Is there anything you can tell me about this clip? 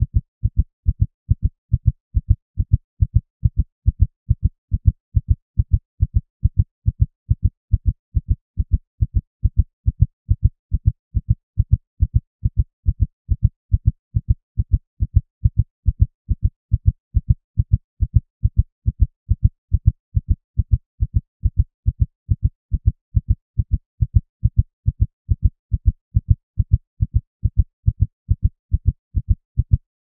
heartbeat-140bpm
A synthesised heartbeat created using MATLAB.
human, heart, synthesised, heart-beat, heartbeat, body